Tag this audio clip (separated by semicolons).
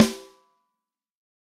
13x3; audix; d6; drum; fuzzy; multi; sample; snare; tama; velocity